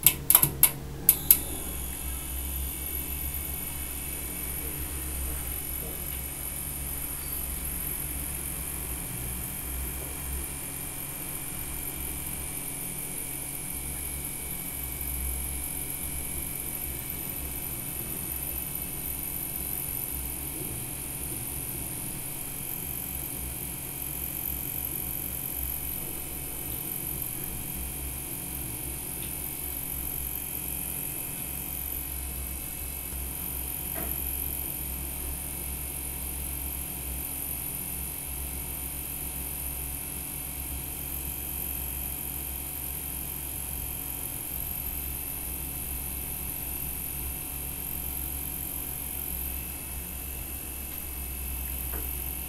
HOME TOILET LIGHT 01
The Light in my toilet buzzing
toilet, buzz